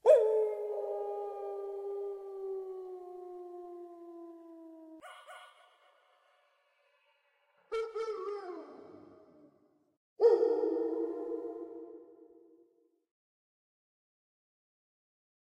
Distant howling pupper
My dog is very tiny, but his howl is pitch shifted with reverb. He looks like a grey fox but howls like a wolf. The sfx is distant howling wolf calls.
distant, howling